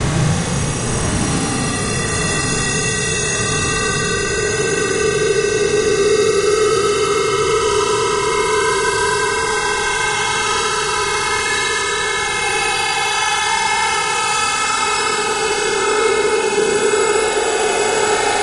FX 08a 20x -12 vsmallW
Used paulstretch, 20x, repitched -12 semitones (1 octave) and a very small scanning window.
There is a nice phasing and panning effect, specially at the start of the sound.
Ableton-Live, FX, RunBeerRun, SlickSlack, audio-triggered-synth, feedback-loop, paul-stretch, paulstretch, raw-material, special-effects